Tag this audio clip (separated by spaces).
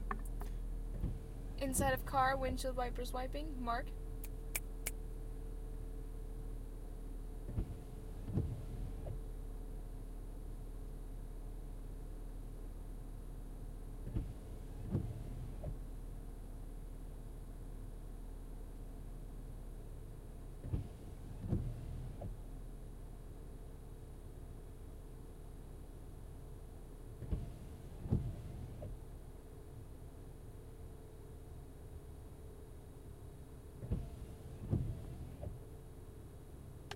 ambience car Honda interior